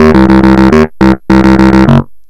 Some selfmade synth acid loops from the AN1-X Synthesizer of Yamaha. I used FM synthese for the creation of the loops.
acid, an1-x, sequence, synthesizer, yamaha